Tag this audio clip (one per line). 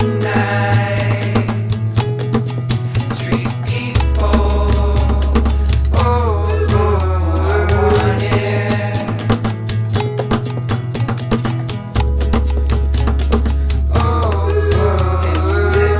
drum-beat
acapella
drums
Indie-folk
guitar
sounds
beat
acoustic-guitar
percussion
original-music
bass
indie
loops
loop
vocal-loops
Folk
looping
whistle
free
harmony
samples
synth
melody
piano
voice
rock